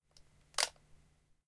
Camera Flash, Lift, F
Raw audio of lifting up the built-in flash light on a Nikon D3300 camera.
An example of how you might credit is by putting this in the description/credits:
The sound was recorded using a "H1 Zoom V2 recorder" on 17th September 2016.
camera, d3300, flash, lift, lifted, nikon, raised